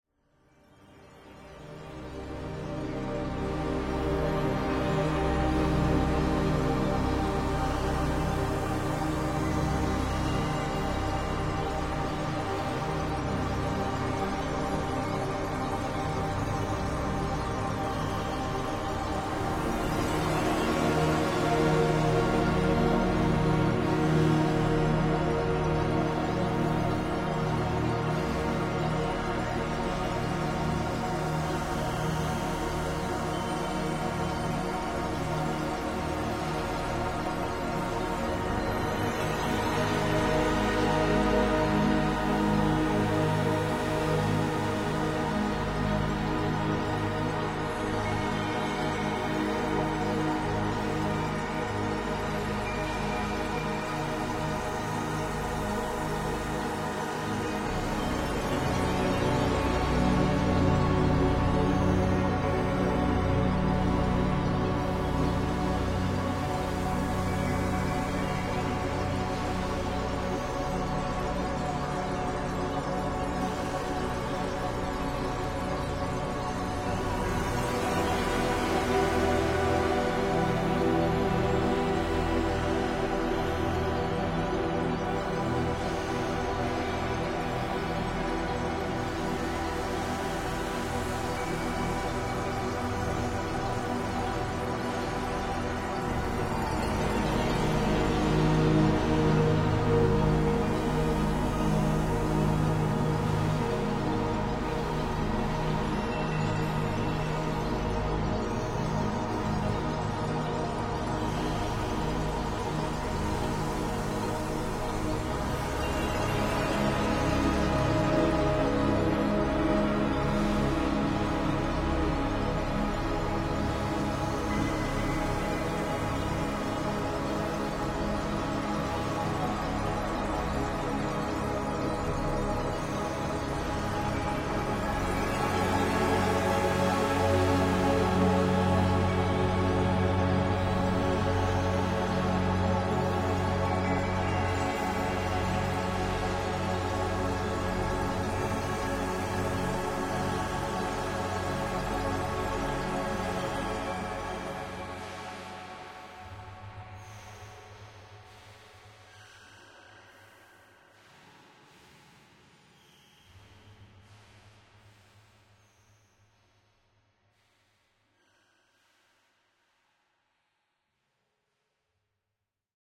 Celestial temple
This set of chords is allmost too good to be true. Are you ready to be blown away? Rendered in FL Studio 8 Producer edition with several instances of Korg Wavestation, Korg M1, reFX Nexus and WusikStation accompanied with a few instances of Synplant bleeps. Took me about 6 hours to compose with all adjustments needed.
angels, bright, close, distant, evolving, godlike, heavenly, overwhelming, pad, smooth, soft